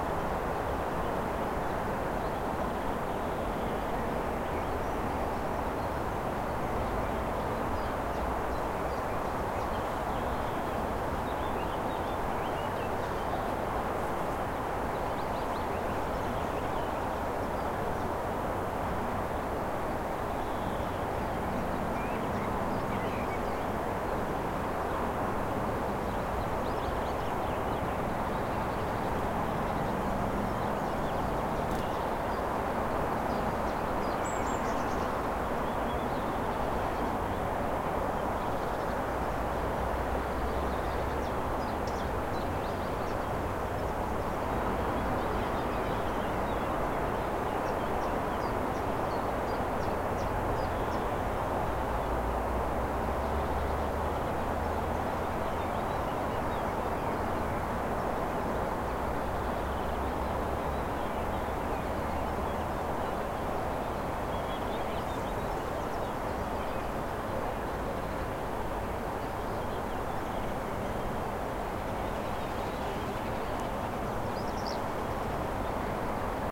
140809 Neuenburg Vista Morning F
Early morning vista from a buttress of Neuenburg Castle, located above the German town of Freyburg on Unstrut.
The recorder is looking down onto the town and the freeway beyond, both of which can be clearly discerned.
Birds are singing, some insects can be heard flying around the recorder.
These are the FRONT channels of a 4ch surround recording.
Recording conducted with a Zoom H2, mic's set to 90° dispersion.